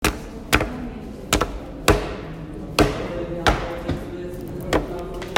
The sound of papers.